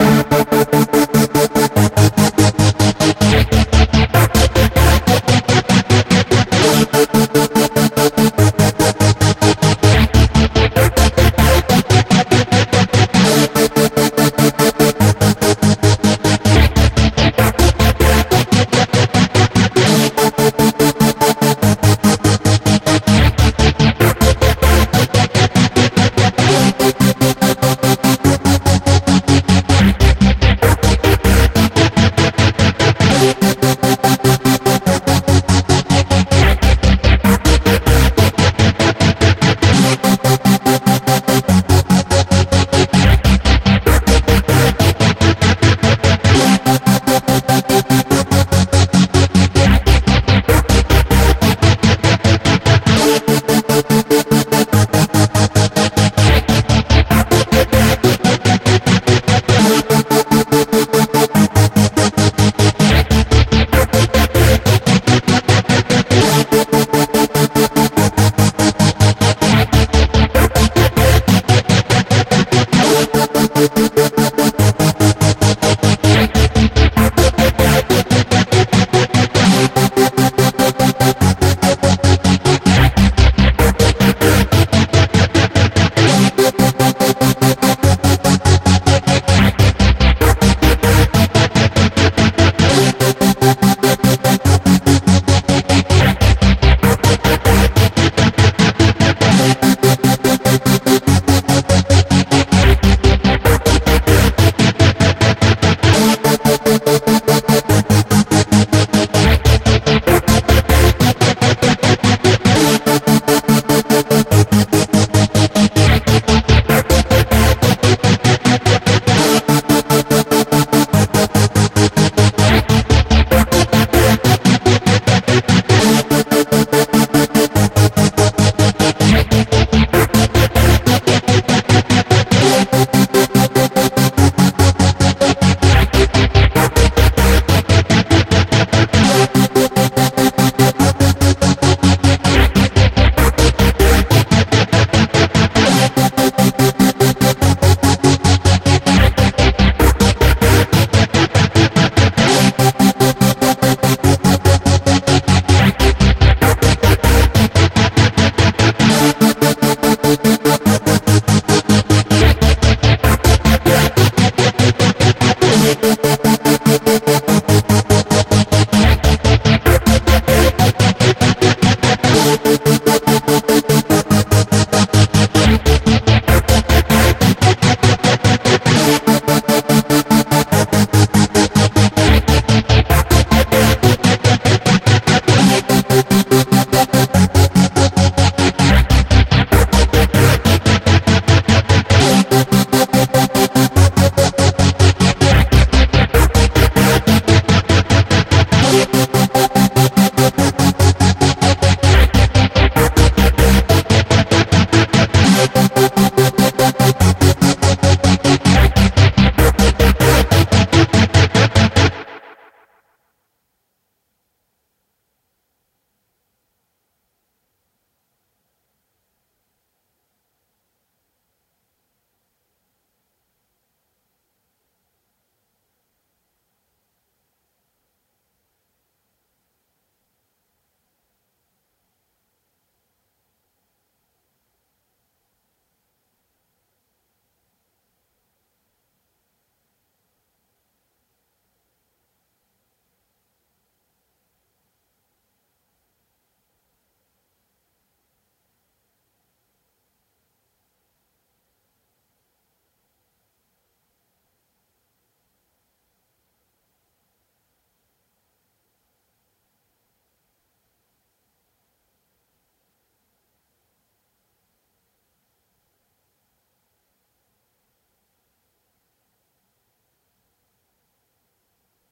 A dementophobic sounding melody made with the free PG8X vst synth.
broody, cold, electro, goa-trance, loop, PG8X, raw, robotic, sequence, synth, vst